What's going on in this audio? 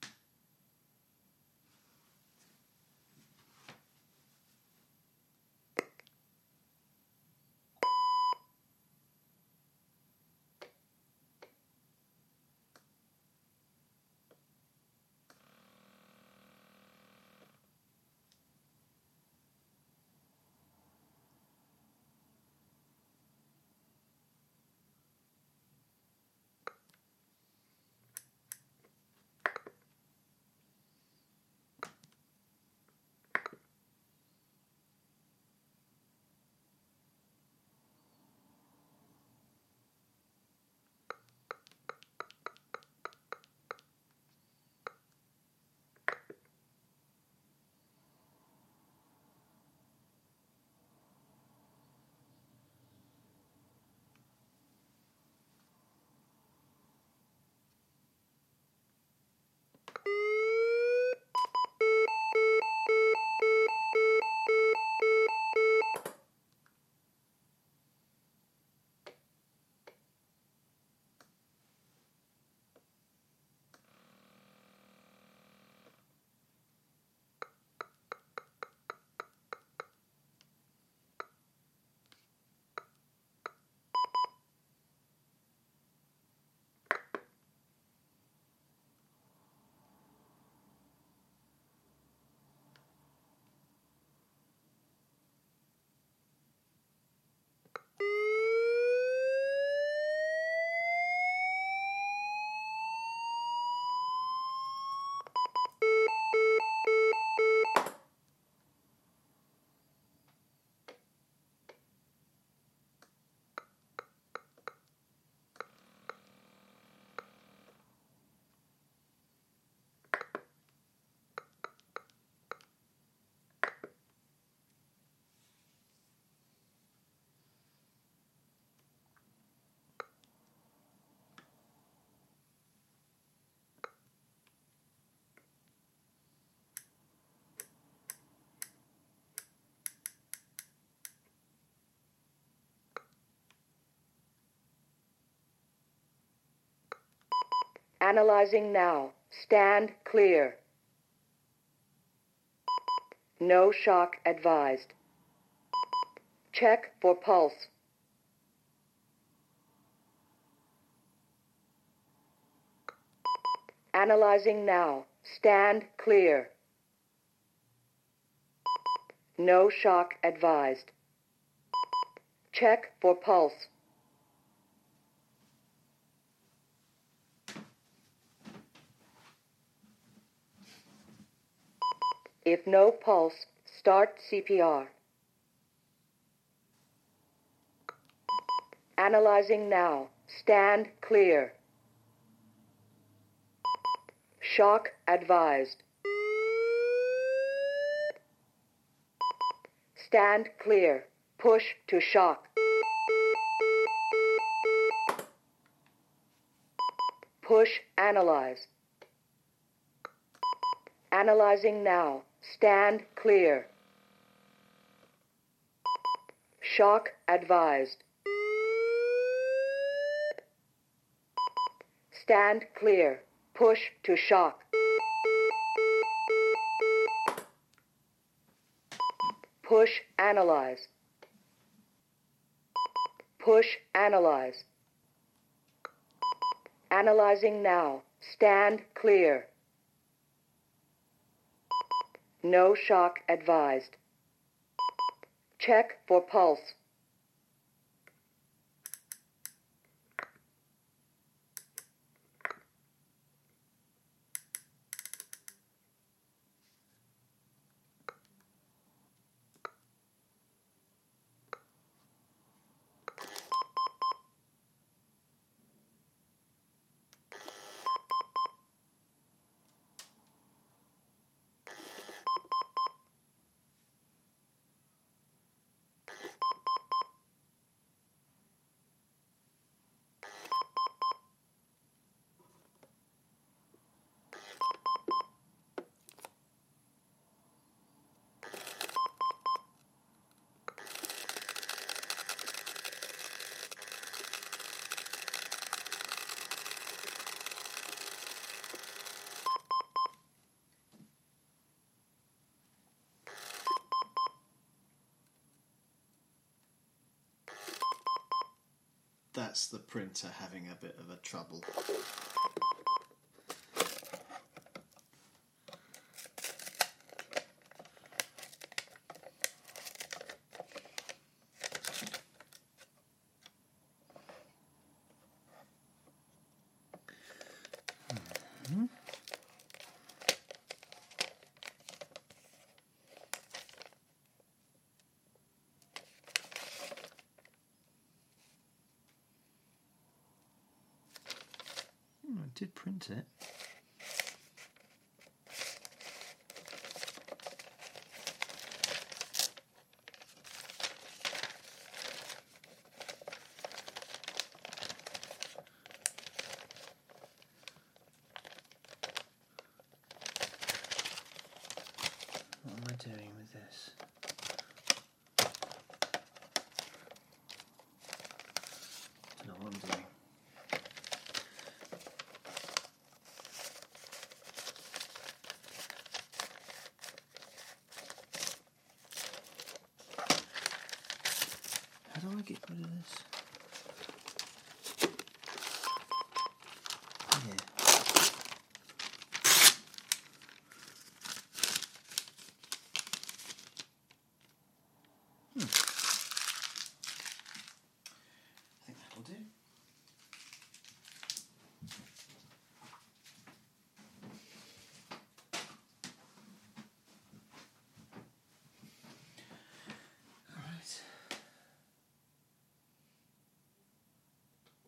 Lifepak 12 touched
Sound grab of a Lifepak 12 ECG Monitor / Defibrillator. Beeps and voice prompts. Borrowed while I was working on a defib tester. It does a bunch of other stuff like invasive BP and was having general printing issues but ... I don't remember what the record chain was.
ecg, ekg, defibrillator